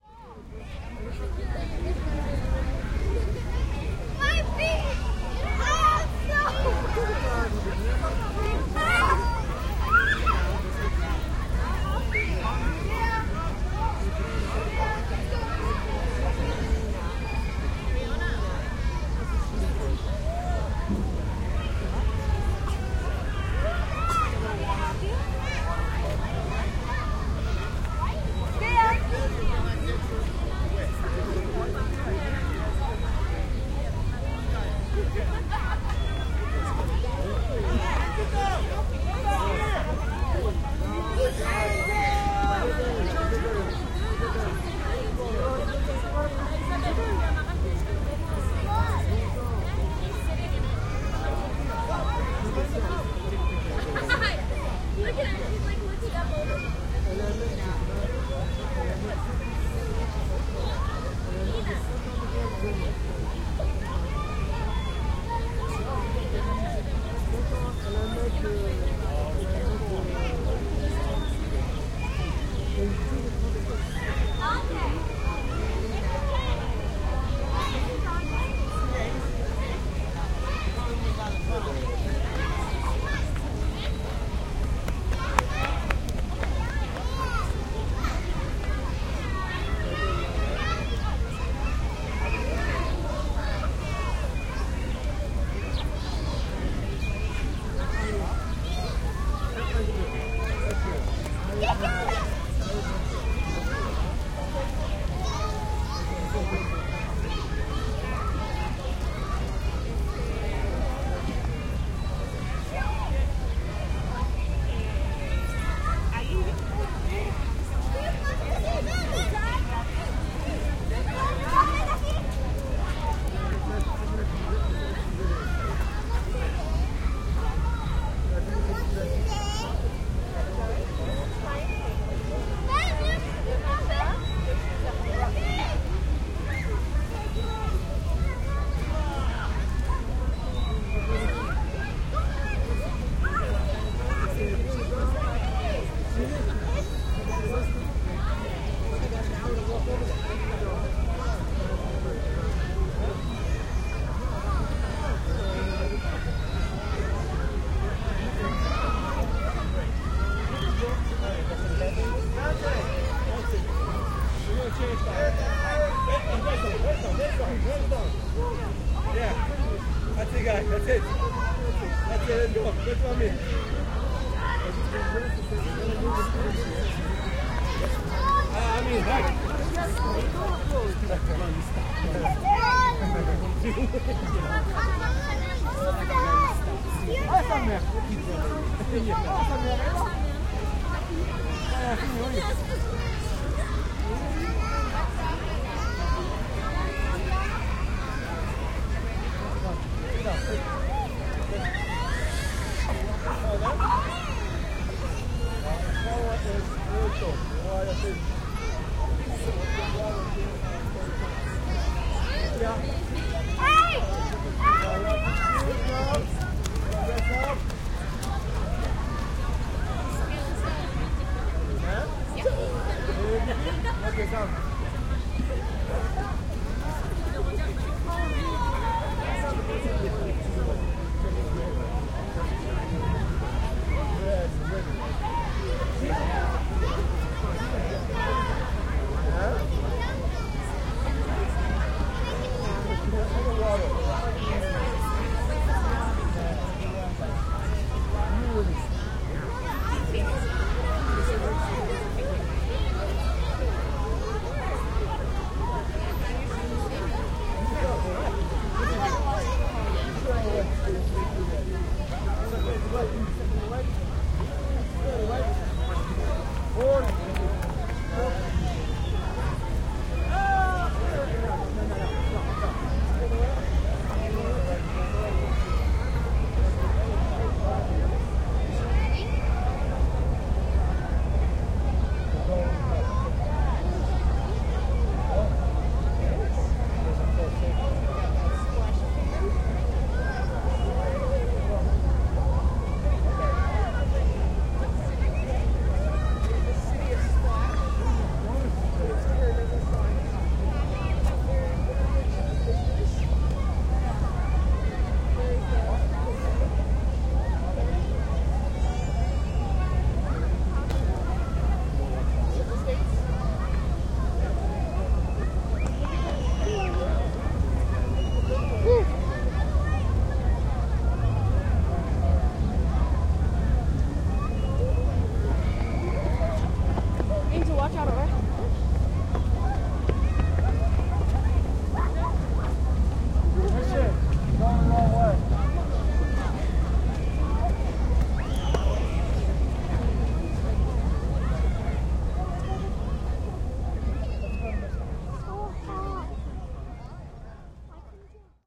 120617 Central Park ambience, kids, voices, running, yelling NYC
Urban park ambience, kids, traffic, Central Park, NYC (2012). Sony M10.
city, kids